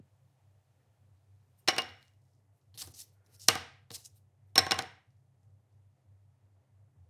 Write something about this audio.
drop pencil on table
drawing, drop, pen, pencil, scribble